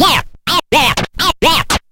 scratch340 looped
Scratching a spoken word. Makes a rhythmic funky groove (loopable via looppoints). Technics SL1210 MkII. Recorded with M-Audio MicroTrack2496.
you can support me by sending me some money:
hiphop, funky, looped, phrase, riff, vinyl, scratch, hook, record, turntablism, loop, dj, loopable